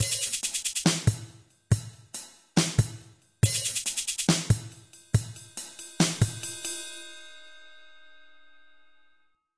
Dubstep Groove
140bpm, dubstep, hat, kick, real-drums, ride, snare